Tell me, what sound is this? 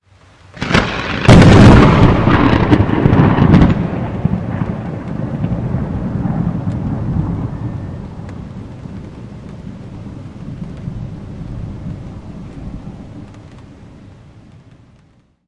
Very loud lightning strike part.2 / Głośne uderzenie pioruna cz.2
Tascam DR100MkIII + Rode NT4